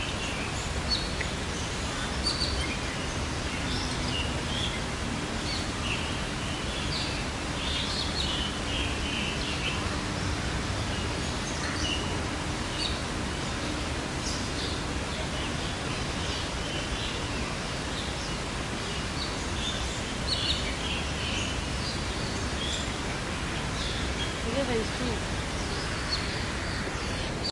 Hong Kong garden aviary birds 2
Hong Kong garden aviary birds
aviary, park